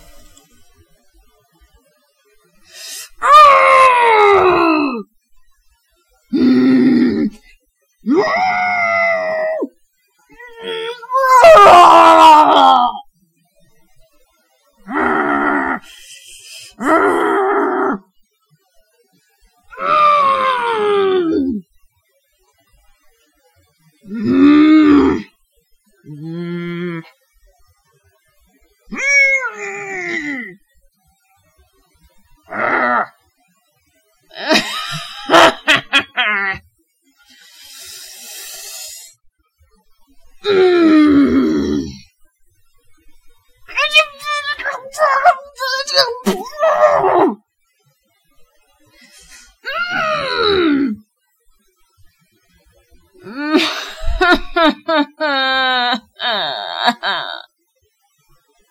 angry noises
me making sounds of anger and frustration, just in case I may need them for cartoons or what not. I'm a girl by the way